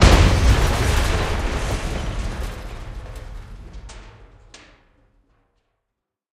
THE CRASH
They include parts of my "Coke Can Chaos" pack, an explosion or two, some glass shattering and even the sound of a television set being dropped off a balcony.
smash, crash, wood, metal, destruction, crunch, crush, bash, boom, impact, explode, destroy, explosion, glass, destroyed, tv, bang, detonation